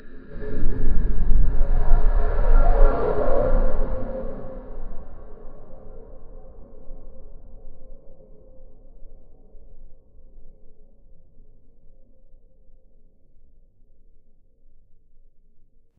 A creepy sound I made with Audacity
creepy, eerie, spooky